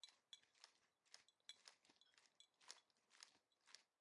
the sound of a cat walking.